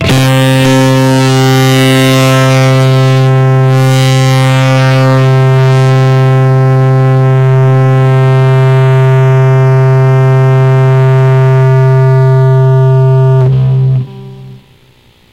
A distorted note on the guitar, recorded through a practice amp.